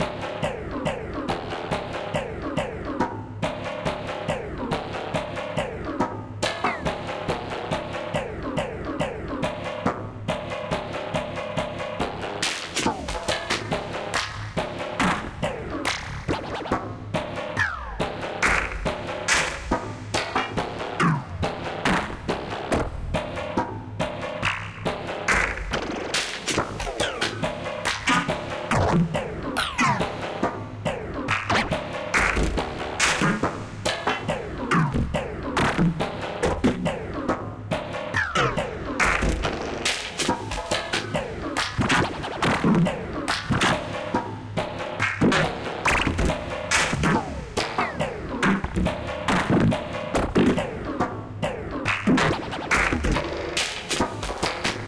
Spring theory
This drumloop is one of the strangest I've composed. It is done through Omnisphere and FL Studio 10. The sound that you hear is several long rubber-bands connected to a metallic Dumbek. The sound is further processed via FM synthesis. The FM depth and frequency is constantly randomized which leads to this sound's vibrating and warped feeling. This sound came out of a lucky accident. I was looking for something entirely different. When I'm testing new waveforms I have 4 quarters laid down so I can hear the sound as soon as it is loaded. So when I heard that the note FL played had a certain rhythmic property then I had to exploit the sound to the fullest. This is the result!